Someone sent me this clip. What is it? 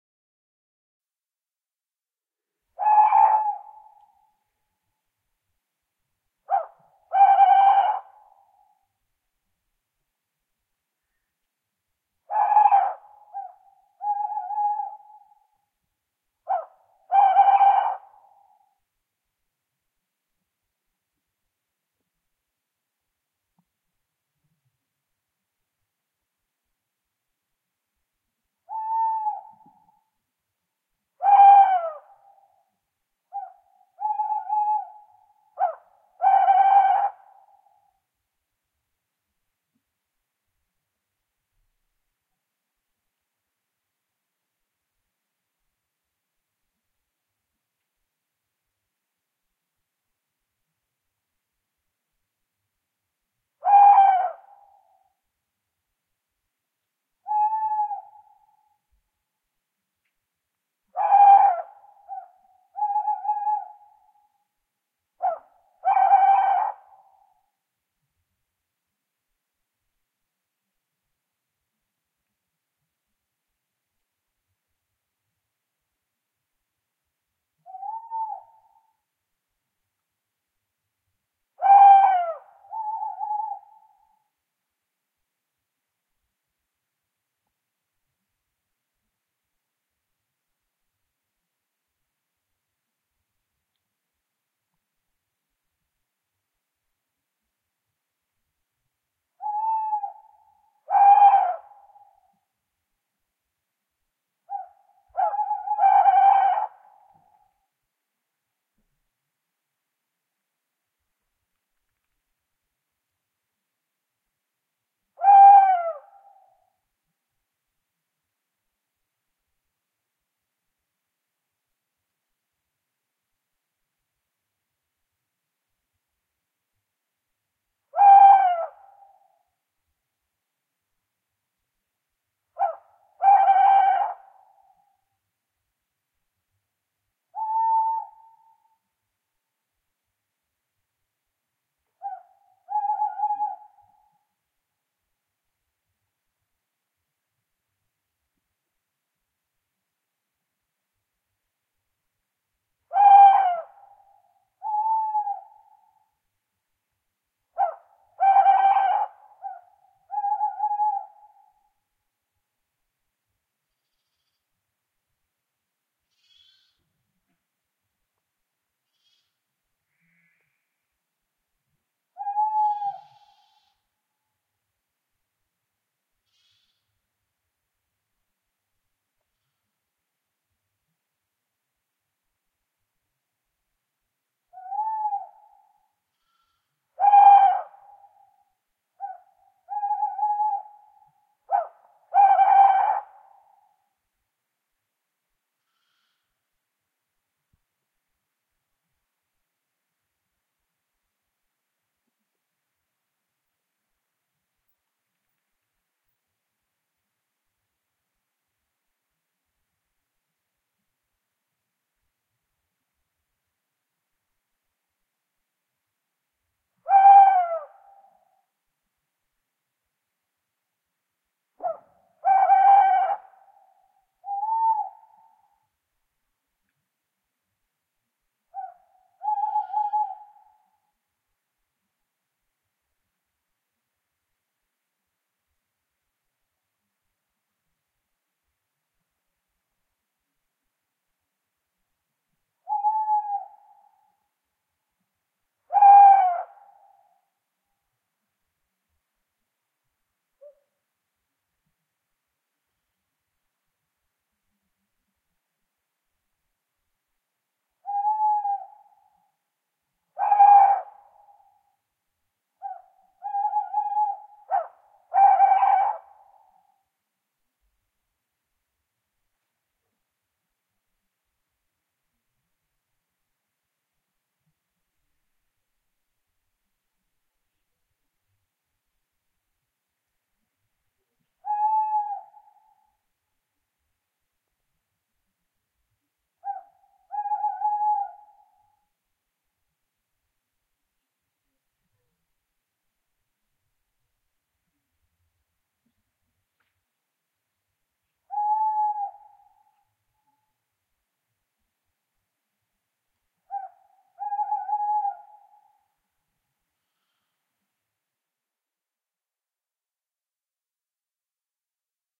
Tawny Owls 3
A stereo field-recording of two male Tawny owls (Strix aluco) hooting, one of which is relatively close mic'd. Rode NT-4+Dead Kitten > FEL battery pre-amp > Zoom H2 line in.
field-recording, hoot, tawny-owl